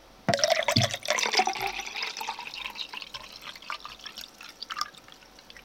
Pouring scotch whisky from a crystal decanter into a glass. Recorded on a Rode VideoMic.